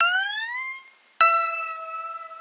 100 Lofi Defy Joker keys 01
Lofi Defy joker keys 1
100BPM, Defy, Destruction, kit, Lofi, remix